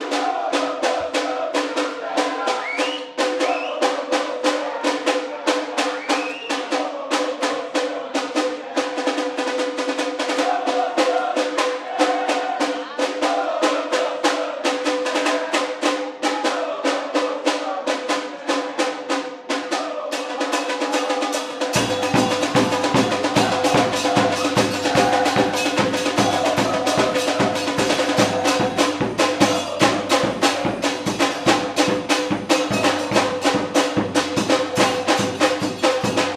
BAtucada
Radio Talk - Stadium - Recording - Soccer - Ambience